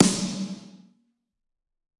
Snare Of God Wet 029
the only fail of this pack was made all sounds scream too much since the beginning of the range :(